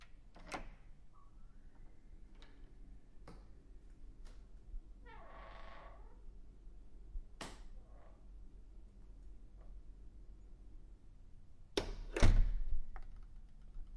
A conference room door being opened and closed.
ambient, closing, conference, Door, foley, office, opening, room